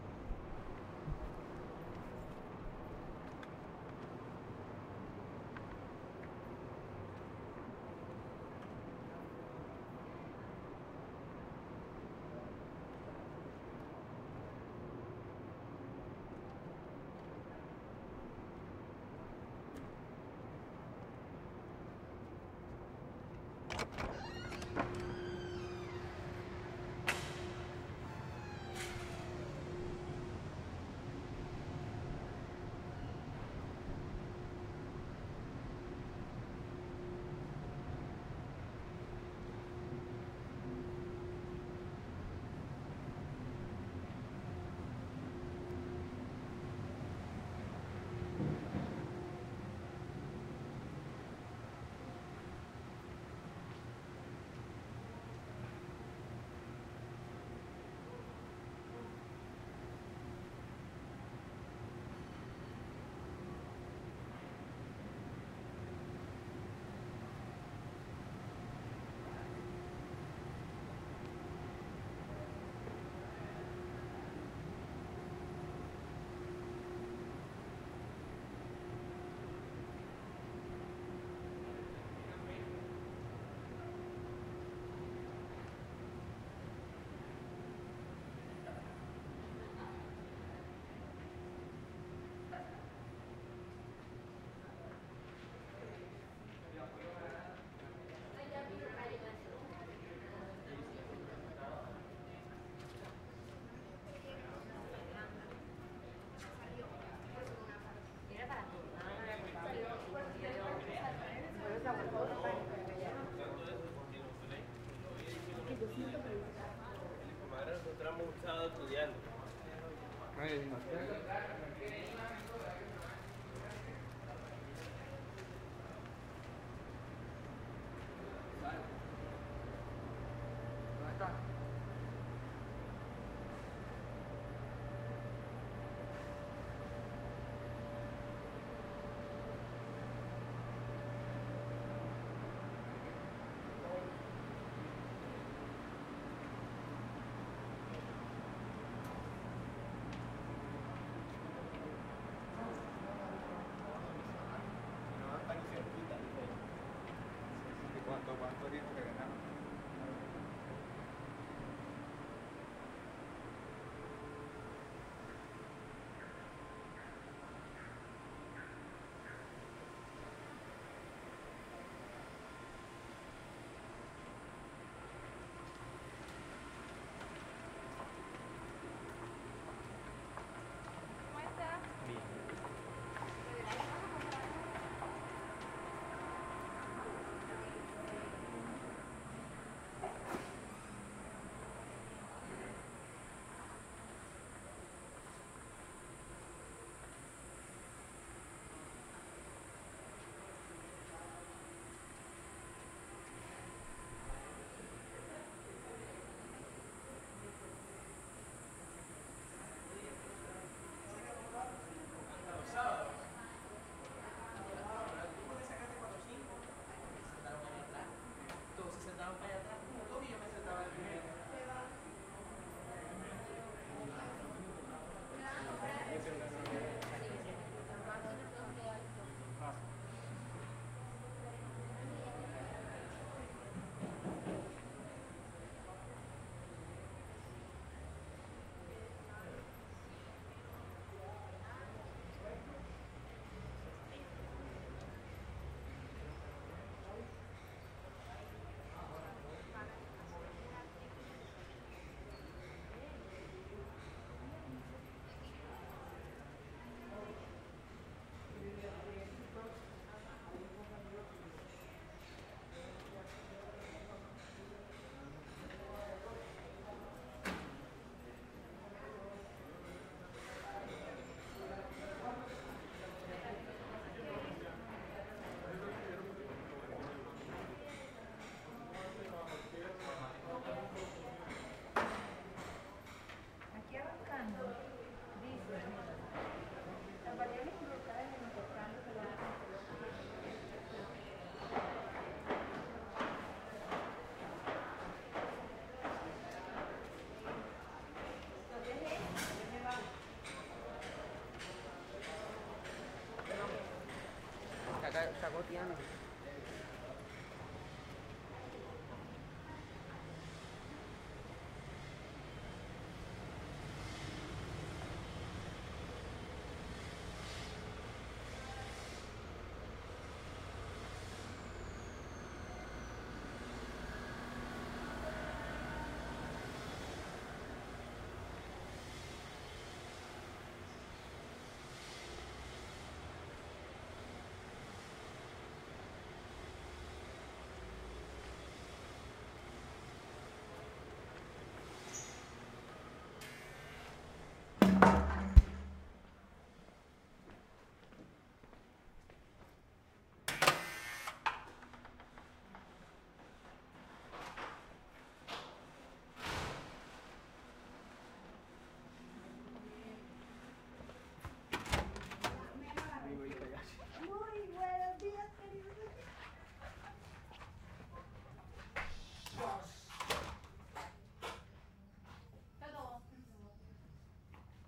CAMINATA-BOOM
Sonido de una persona caminando por una Universidad
CAMINATABOOM, SONIDOCAMINAR, AMBIENTE